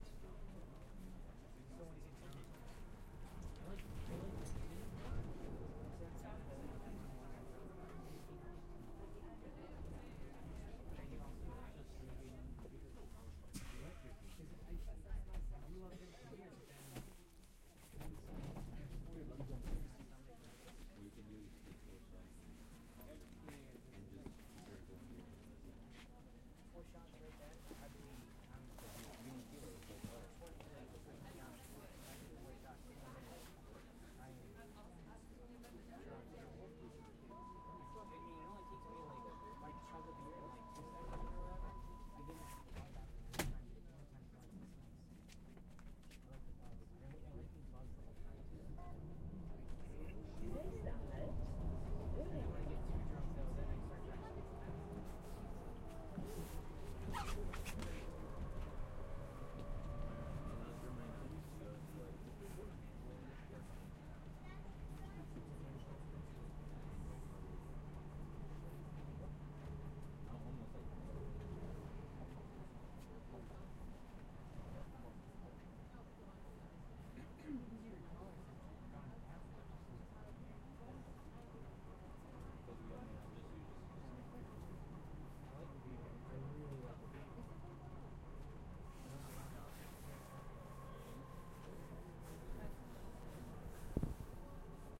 atmo suburban railway inner voices hamburg1
Atmo from within a suburban train in Hamburg. Recorded on a Zoom H5 with x/y-head.
ambience, atmo, atmos, train, voices